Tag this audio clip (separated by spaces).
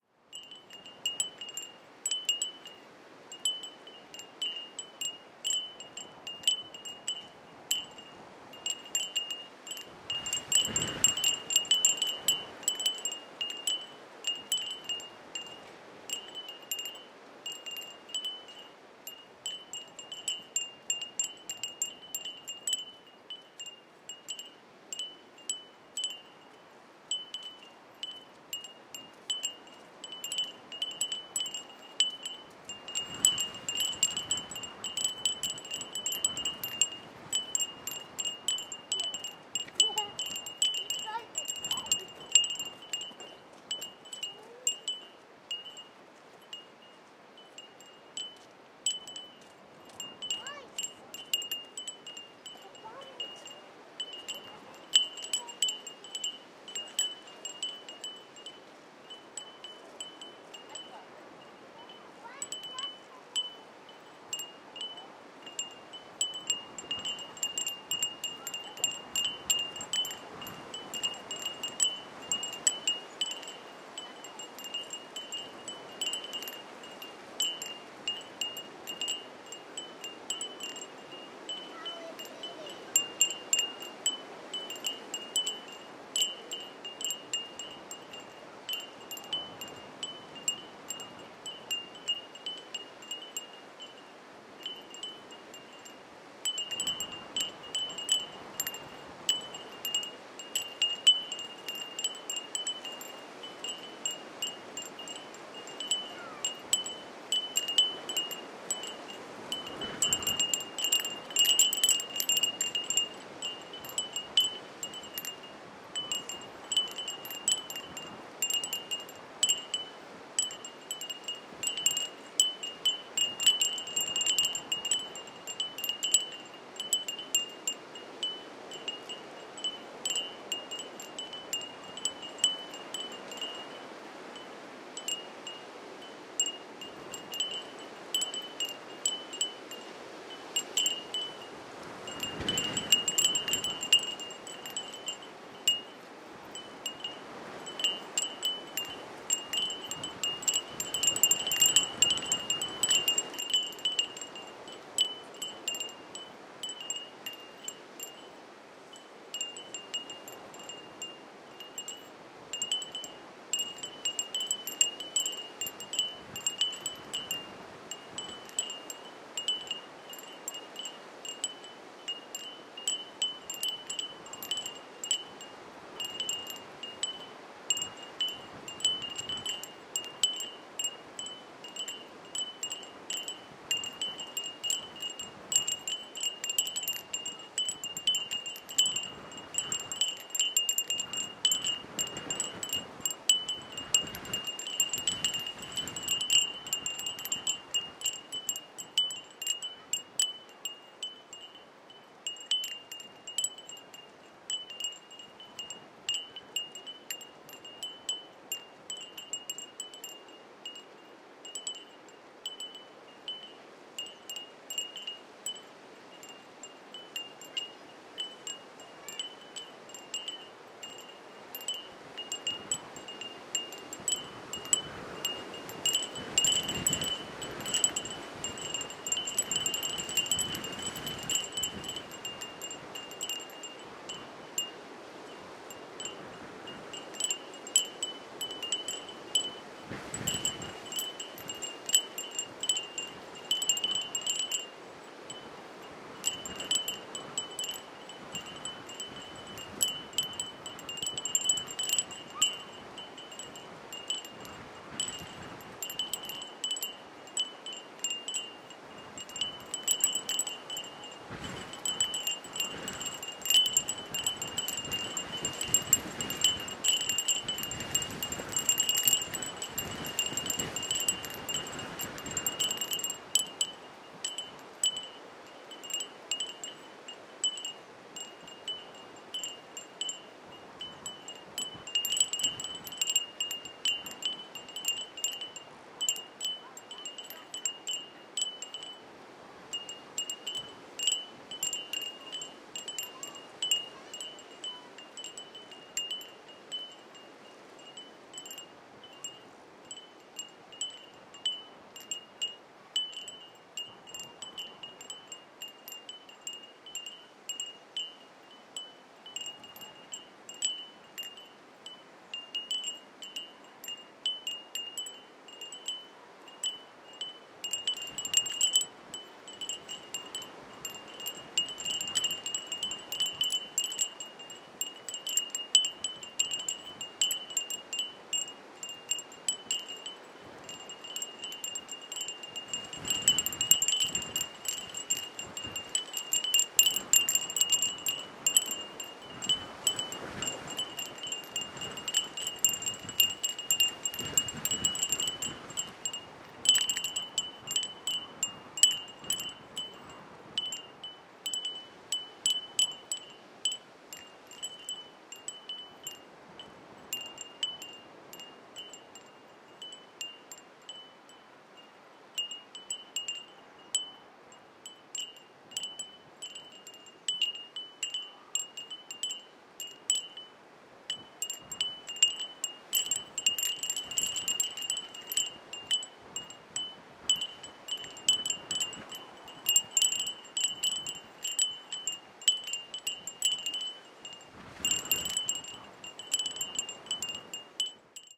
gusts chimes wind windchimes